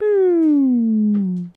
sonokids-omni-03
Part of my unfinished pack of sounds for Sonokids, me saying "boooooo" with a drop in pitch as it goes along.
male, sonokids, funny, voice